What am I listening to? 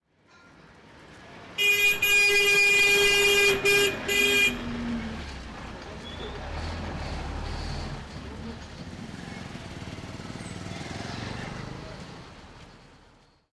auto horn honk angry driver pass doppler India
angry, auto, doppler, driver, honk, horn, India, pass